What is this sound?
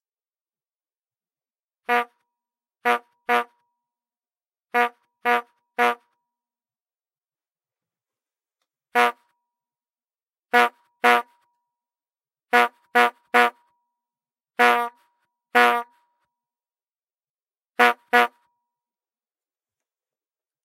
Brass Bulb Horn 1
Old Brass Horn. Recorded with MOTU Traveler and AT 2035
Bulb, Horn, Old, Rubber, Squeeze